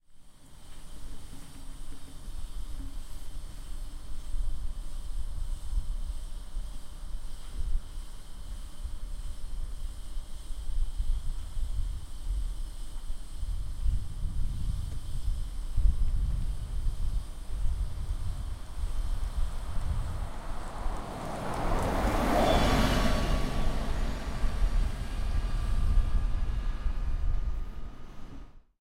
Traffic 2, bus passing
A recording of Traffic in Northern Lund, Sweden. A local bus passes by. The sound in the beginning is from a factory making some sort of noise.
bus, ambience, road, street, Traffic, cars, foley